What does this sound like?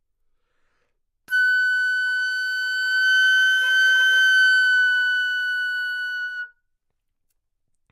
Flute - F#6 - bad-stability-pitch
Part of the Good-sounds dataset of monophonic instrumental sounds.
instrument::flute
note::F#
octave::6
midi note::78
good-sounds-id::3161
Intentionally played as an example of bad-stability-pitch
single-note, Fsharp6, good-sounds, flute, neumann-U87, multisample